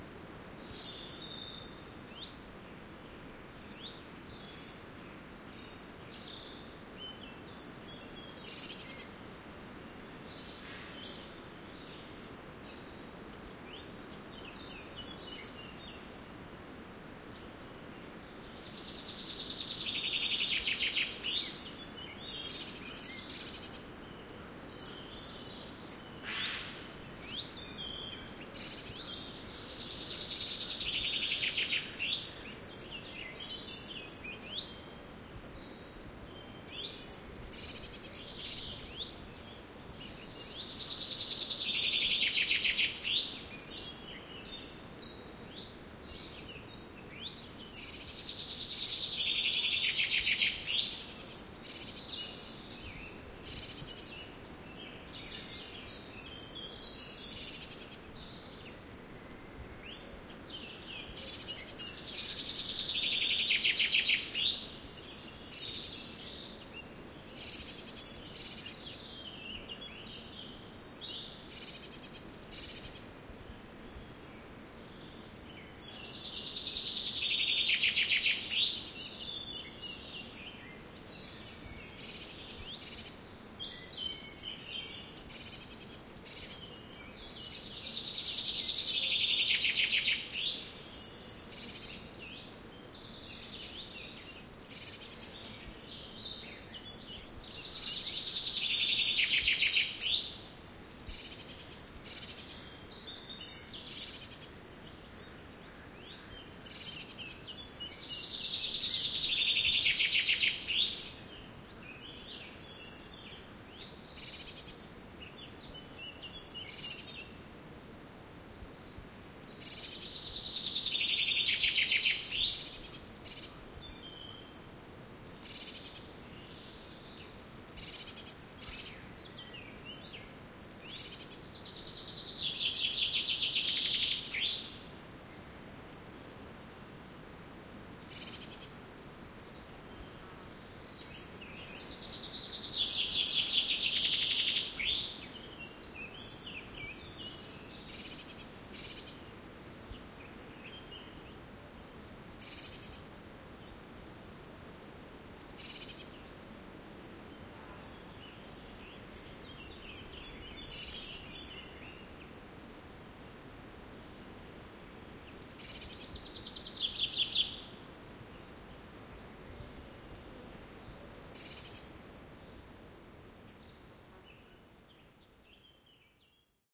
Ambiance in a forest in France, Gers.wind in the trees, many birds, distant streams.Recorded A/B with 2 cardioid microphones schoeps cmc6 through SQN4S mixer on a Fostex PD4.